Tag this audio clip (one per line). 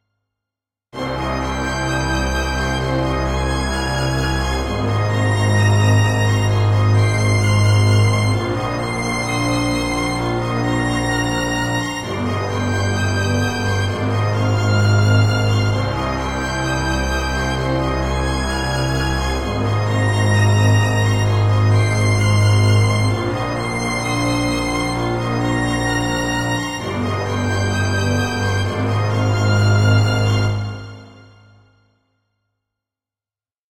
orchestra
orchestral
violin
contrabass
strings
loops
ensemble
double
loop
classic